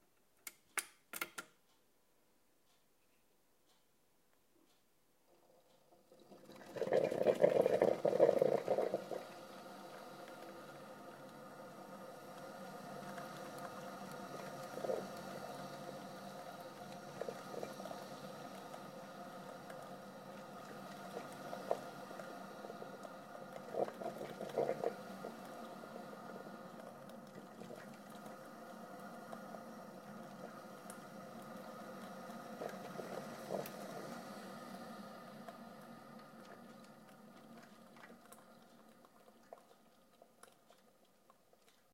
Brew a cup of coffee
I'm brewing a cup of coffee.
recorded with my Zoom H4n pro and edited by wave lab
service, a, fika, cup, water, field-recording, bubbles, zoom, h4n, coffee, Brew, machine